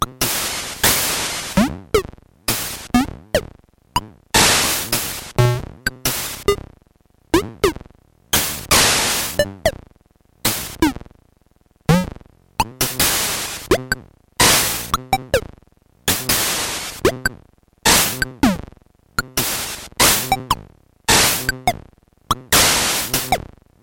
A pitch mangled chunk of drums. No loops, but good for single hits. Recorded from a circuit bent Casio PT-1 (called ET-1).